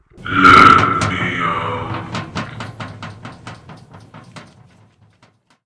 let me out 1
This is a sound I done for a game, a demonic voice with banging calling to be let out. Anyone can use my sounds, it would be nice to hear from you, although I know leaving messages can be a pain so I forgive you if you don't lol.
Horror, Trapped, Banging, Monster, demonic, Scary